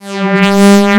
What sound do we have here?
saw 200hz phase
Ideal for making house music
Created with audacity and a bunch of plugins